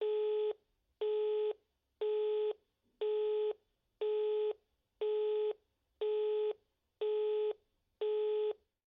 Occupied Signal Telephone
Occupied signal for phones.